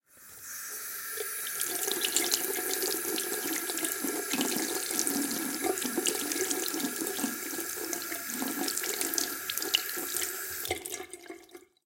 Sound of waterflow